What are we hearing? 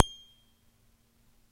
Recording of me plucking the strings on the headstock of my cheap Rogue guitar. Recorded direct to PC with a RadioShack clip on condenser mic.
electric, string